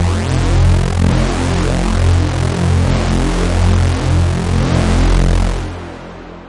SemiQ leads 12.
This sound belongs to a mini pack sounds could be used for rave or nuerofunk genres
sci-fi, sound, sfx, soundeffect, pad, effect